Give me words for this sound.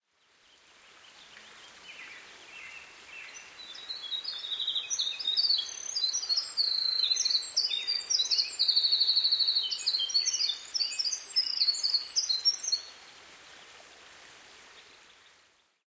Winter Wren (Troglodytes troglodytes), recorded in Nova Scotia, Canada, April 2010.